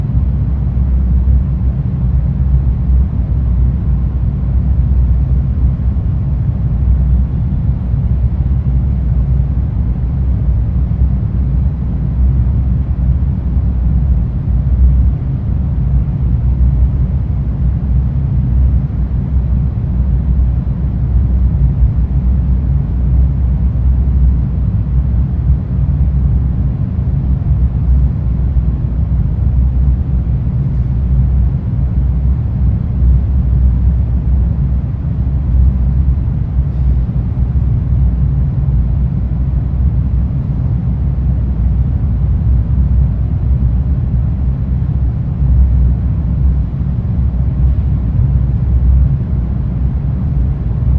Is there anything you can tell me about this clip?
industrial vent bassy close2
bassy, close, industrial, vent